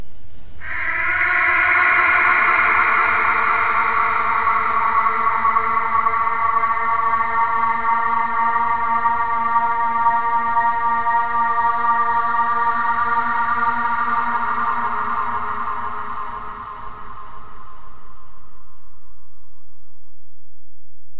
Sometimes i Scare Myself
I didn't know I could sing that well! It's obviously processed a bit, but wow...